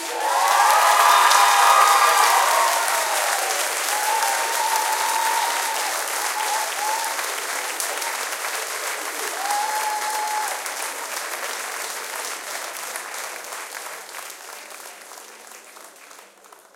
Small audience clapping during amateur production.